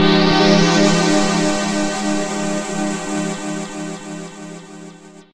a short synth pad